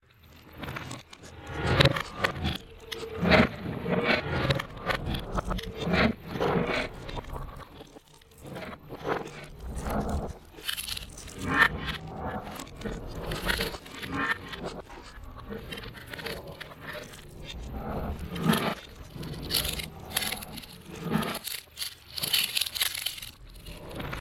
Made from a recording of me fiddling with a toaster oven,
(opening and closing it's door, and turning the knob) which I
edited through multiple different sound software.
This sound, like everything I upload here,
chattering, free, low, sound-design, voiced, voices, whisper, whispering, whispers